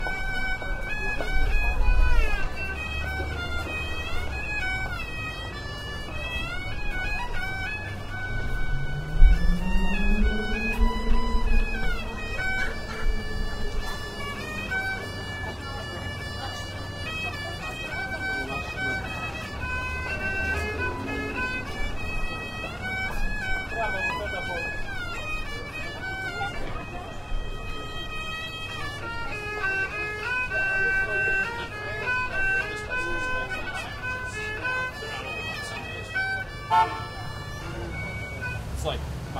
Chinese viola player on the street in North Beach, San Francisco
Francisco, San, viola, China